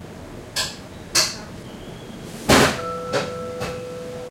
Last of the random sounds taken from a camcorder accidentally left on in my studio.
Crash is stainless steel cooking pot being dropped, mishandled in a kitchen approx. 6m from the camcorder mic.
I did remove a tiny bit of hiss and amplified the loudness slightly using Adobe Soundbooth CS3.